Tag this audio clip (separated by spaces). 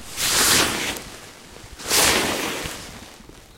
clothes clothing cotton curtain curtains structure synthetic textile texture